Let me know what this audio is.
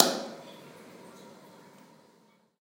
WC impulse 2
convolution, tiled